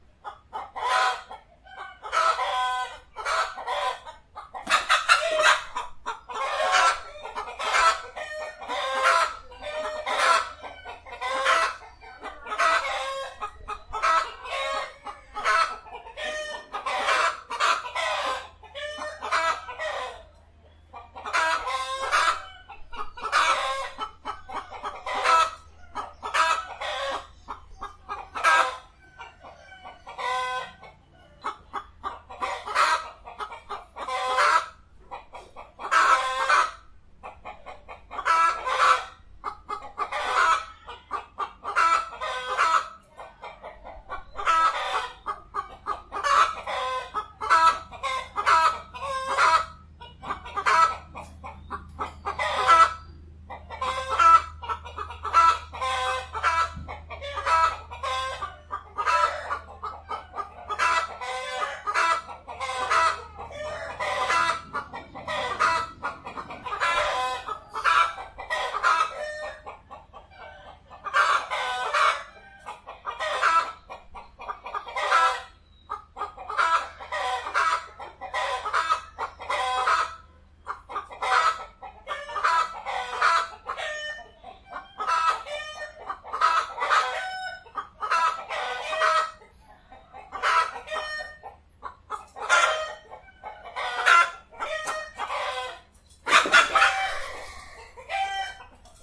Hót Đi Gà Hót Đi Gà
Neighbor chickens sing together. Neighbor not like noise and throw object at chicken and chicken fly. Record use H4n Pro 2019.01.09 13:00
chicken, chickens, sing